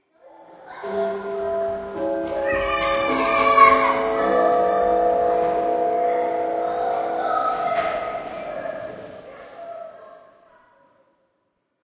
The break bell in the school
Germany, Essen, bell, school